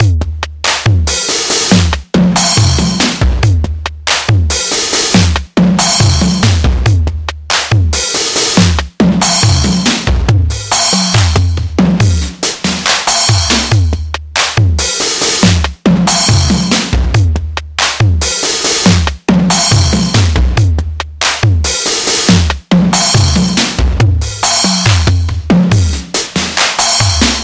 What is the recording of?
75 bpm Acoustic Studio Clean Attack loop 6
ATTACK LOOPZ 02 is a loop pack created using Waldorf Attack drum VSTi and applying various amp simulator (included with Cubase 5) effects on the loops. I used the Acoustic kit to create the loops and created 8 differently sequenced loops at 75 BPM of 8 measures 4/4 long. These loops can be used at 75 BPM, 112.5 BPM or 150 BPM and even 37.5 BPM. Other measures can also be tried out. The various effects are all quite distorted.
4, 75bpm